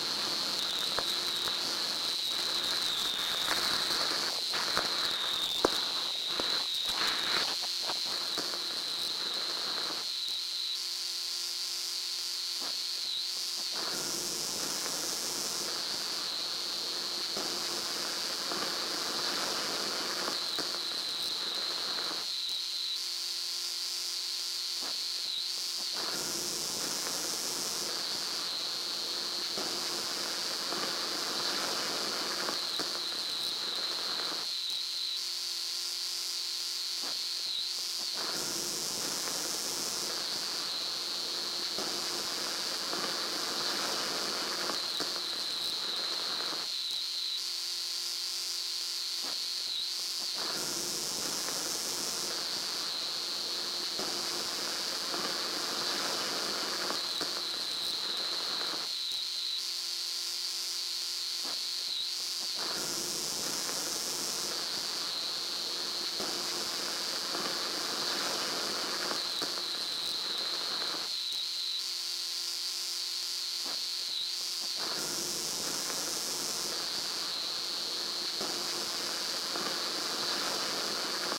This is the sound of ambient hiss and click recorded from a de-tuned vintage tube (valve) radio onto ferrous magnetic tape then fed to digital via computer. It is a combination of two analogue processes. Perfect for creating authentic vintage radio's ambient hiss and click. From a 'pack' of vintage radio sounds that is going to grow and grow.